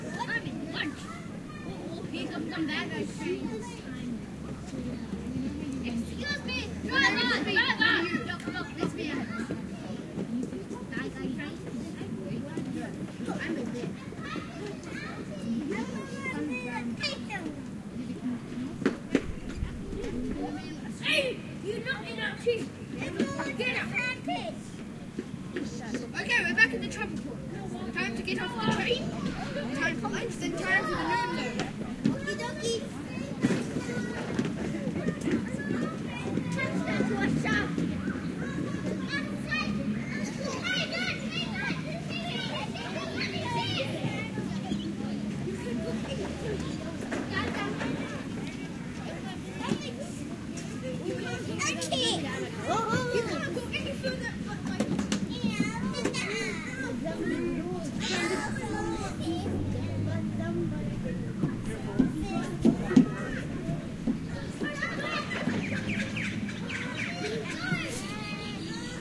Kids in Melbourne playing in a playground. Some kids are pretending to be on a train. Has car zooming past in the background, some birds at the end.
Good for playground in the suburbs. Recorded with Olympus LS-10
children, playing, suburban